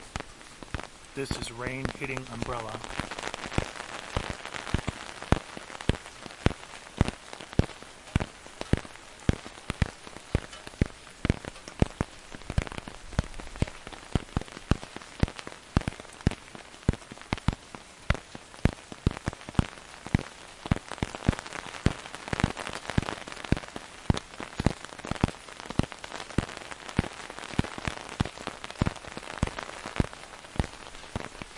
FIELD LA Rain City Under Umbrella 03
Rain recorded in Los Angeles, Spring 2019.
Standing under an umbrella in the rain.